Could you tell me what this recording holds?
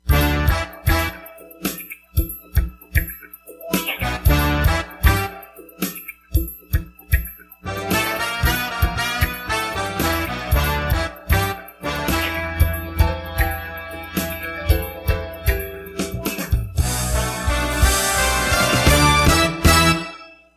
Agent (Intro Music)
ACTION, ADVENTURE, CINEMATIC, INVESTIGATE, MISSION, SPY